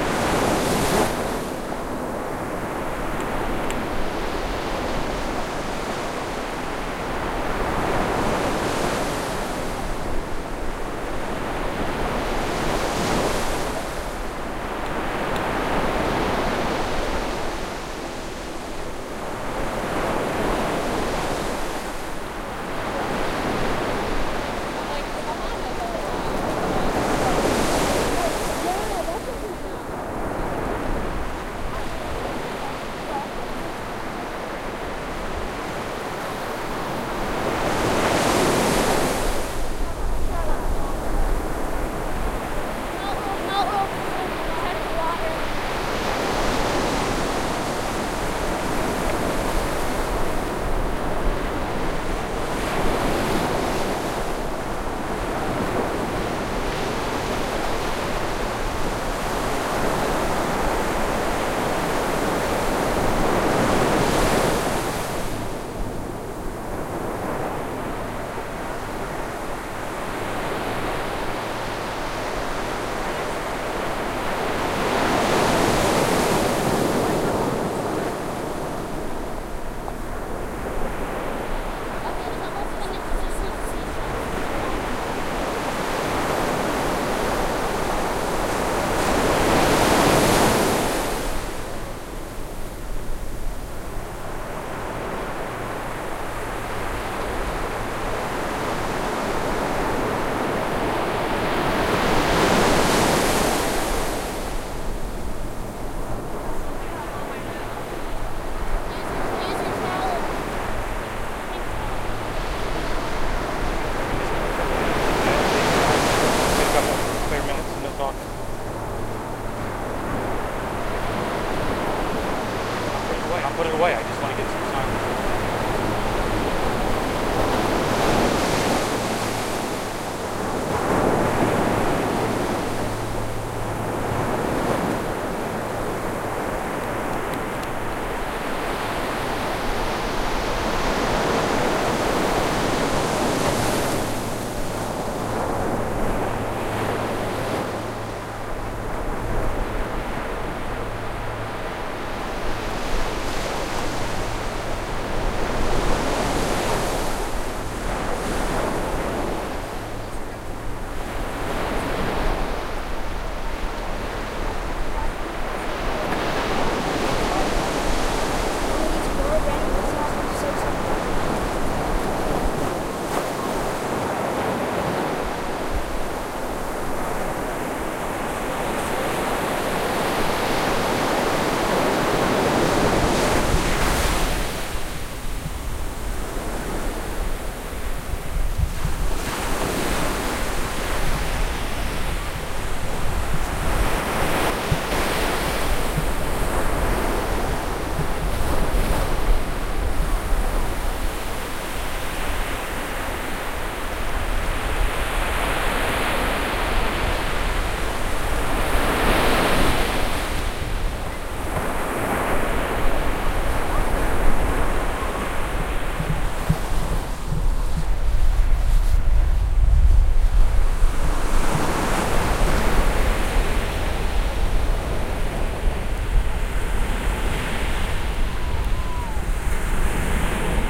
I made a few attempts to simulate a stereo beach scene. I copied the file, lowered the volume, swapped the channels offset by half a second (too much) and then mixpasted back over original (with lowered gain as well). Aside from the obvious delay on the human voices and obvious sounds, it seems to have worked good enough for me.
surf, waves, beach